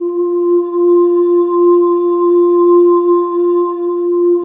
This is a processed (autotune, stereo phasing effects, reverb, and loop) recording of me (a male) singing an F note.
🎵OOOOOOOOO🎵